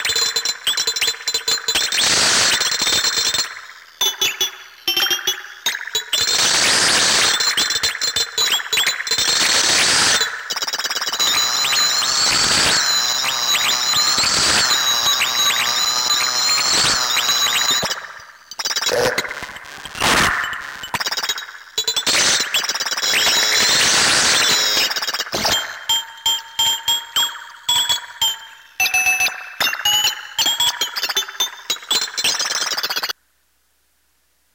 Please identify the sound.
Freya a speak and math. Some hardware processing.